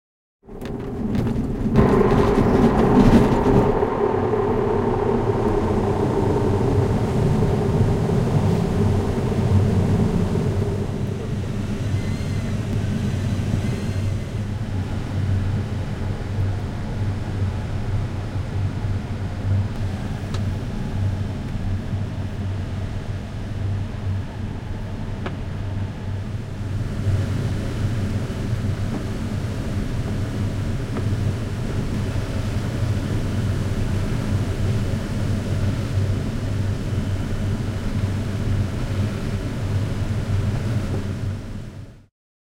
Sailplane Liftoff
liftoff and flight of a sailplane, recorded onboard
flight, glider, launch, liftoff, sailplane